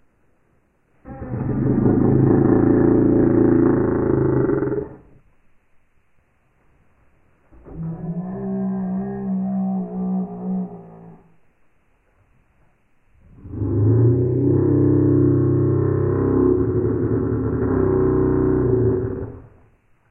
Lamb Chop Speed Down
I used a time compression expansion on Audacity to slow down a goat "baw". The sound becomes a low pitched, guttural growl as the frequency has been made to move half as fast. There are two goats.
lamb, bah, growl, pitch, goat, slowed, low, farm, berber, chop, guttural, down, aip09